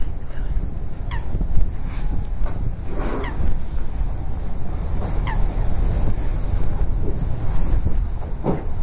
Listen to the grebe. Recorded with a Zoom H1 recorder.
bird, Deltasona, river, field-recording, airplane, aiport, grebe